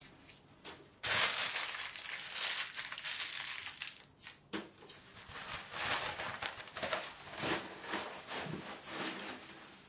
rub the paper mono